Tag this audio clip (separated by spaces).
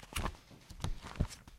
multiple hit clatter random sloppy object